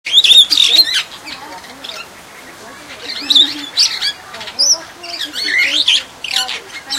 Taken while videoing a Superb Starling in Lotherton Hall bird Garden
singing, Starling, Exotic, Bird, Superb